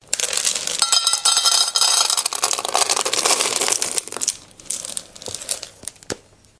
Pouring cat biscuit into a bowl
A sound effect of cat biscuit being poured into a cat bowl
bowl, eating, cat, pet, food, biscuit